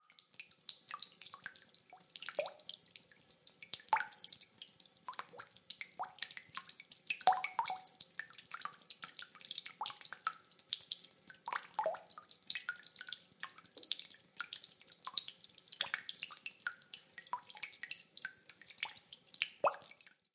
Drops falling into the water
Fallings drops of water into full sink.